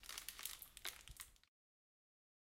Footstep Ice Long Crack
This sound is of someone taking a step on ice and it giving a long cracking sound.
Long, Footstep, Ice, Crack